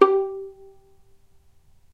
violin pizz non vib G3
violin pizzicato "non vibrato"